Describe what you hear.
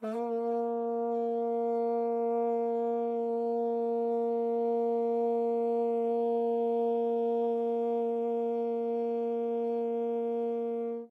Low note (A#) of a plastic vuvuzela played soft.
microphone used - AKG Perception 170
preamp used - ART Tube MP Project Series
soundcard - M-Audio Auiophile 192